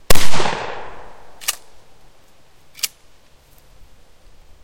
Mossberg 500A - 1 shot and pump alt
Firing a Mossberg 500A in a woodland environment, 7 1/2 load.
Recored stereo with a TASCAM DR-07 MkII.
Here's a video.
firing, gauge